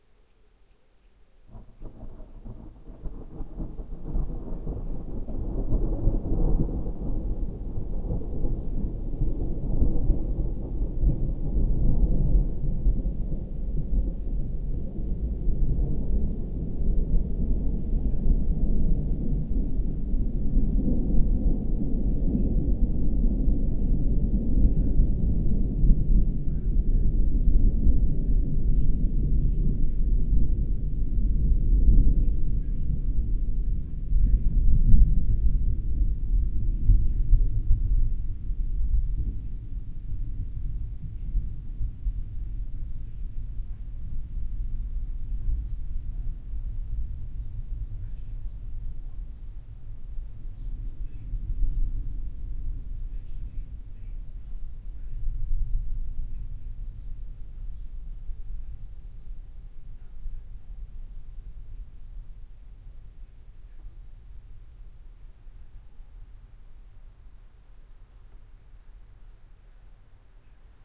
Last time it thundered at the cottage I had forgotten to bring the recording equipment, but not now. Thunder with a lot of deep bass
Mikrophones 2 OM1(line-audio)
Wind protect Röde WS8
low, nature, rumble, low-frequency, field-recording, bas, lightning, weather, thunderstorm, storm, deep-bass, thunder-storm, thunder, rain